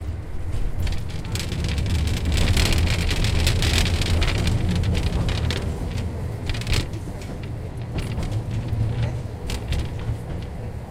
Clatter of glass in the tram window.
Recorded: 2012-10-25.
city, city-car, clatter, glass, noise, rumble, tram, vehicle, windows